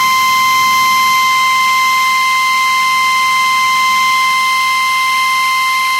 Pneumatic drill - Atlas Copco lbv45 - Run
Atlas Copco lbv45 pneumatic drill running freely.
2bar, 80bpm, air-pressure, atlas-copco, crafts, metalwork, motor, pneumatic, pneumatic-tools, tools, work